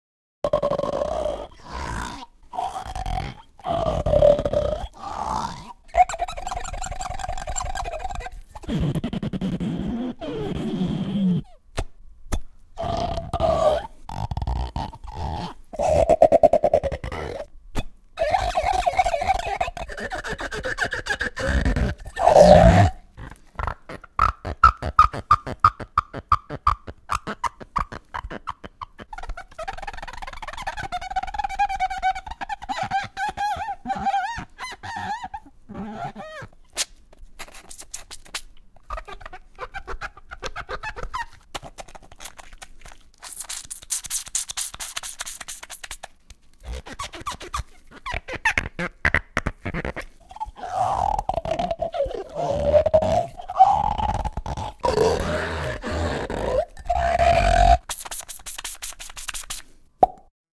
You can make some really funny sounds when doing the dishes! This is a collage of sound snippets of all types and sorts. I decided to create one big file instead of dozens of little ones, so you have to slice it up to pick the right one for your purpose. Ideal for cartoons or funny situations. I apologize for the breathing you hear now and then, I had a cold.